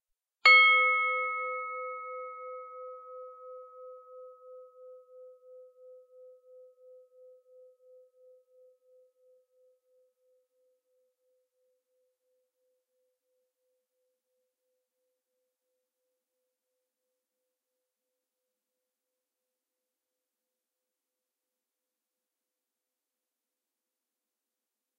A stereo recording of a steel bell struck with a wooden striker (fire alarm bell).. Rode NT-4 > FEL battery pre-amp > Zoom H2 line in